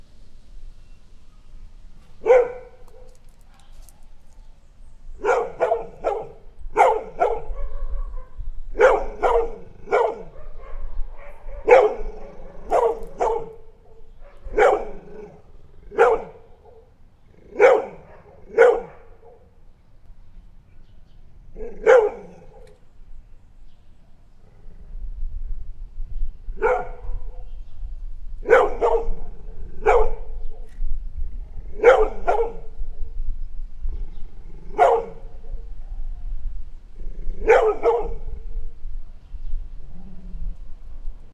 Cão latindo (fraco)
Cachorro latindo (fraco)
latido, cachorro, barking